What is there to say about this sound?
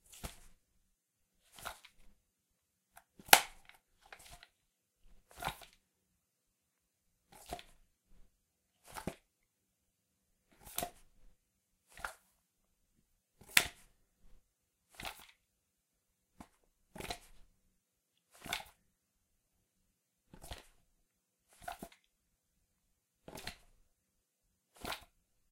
Holstering and unholstering a Colt Navy replica in a heavy leather holster.